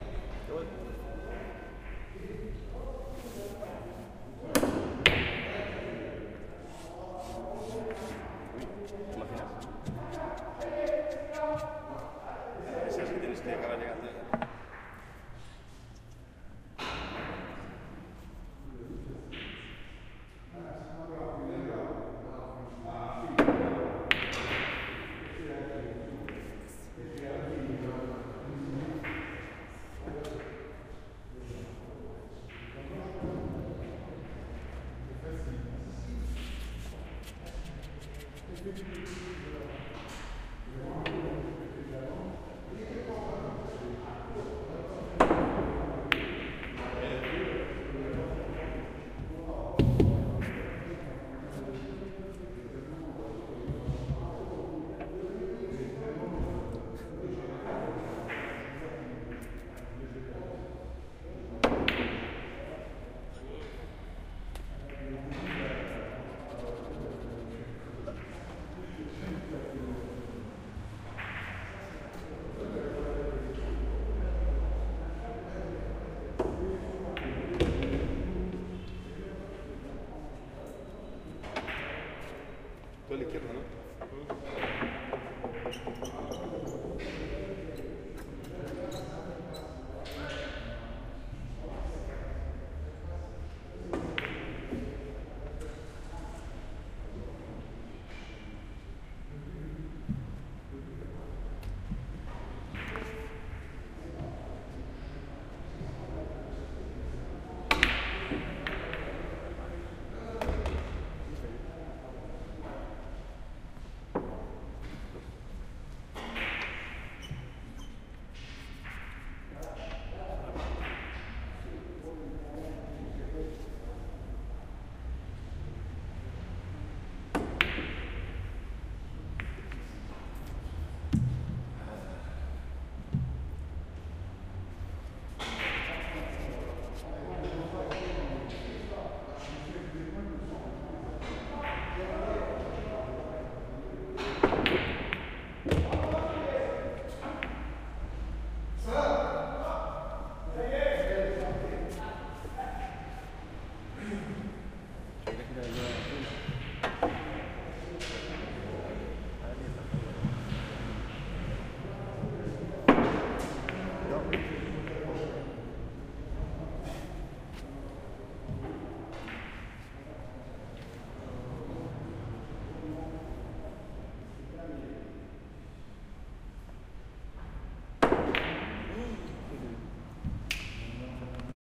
Lyon Ambience Salle de billard
Stereo recording (sorry, compressed recording). Billiards place in Lyon (France).
pool, ambience, snooker, crowd